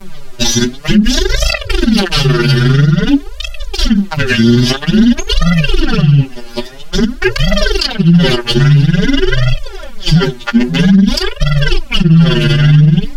Our Brains are sensationally smart. I have damaged this well known song, so much damage to the file that there is no sinus Waves left (our sound center is based on sinus Waves. The resulting song should be meaningless noise, but not for our brilliant brain, instead you hear ,cleary, Happy Birthday to you. More complicatd than what you Believe!